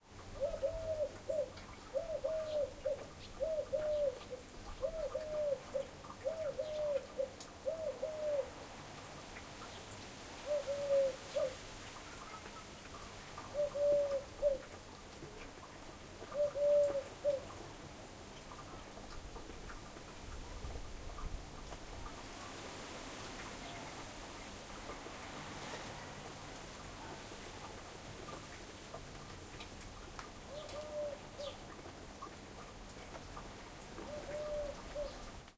Sound recorded in the garden of a little town in a rural area. Apart from the sound of the wind and a fountain, there is the sound of different birds.
Campus-Gutenberg, Bioscience, Wind, Birds, Garden, Fountain